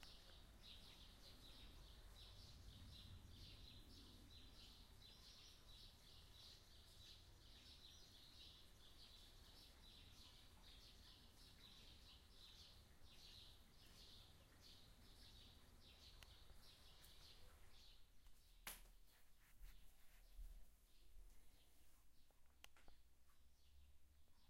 MySounds GWAEtoy Birdssingingoutsidethewindow
TCR, field, recording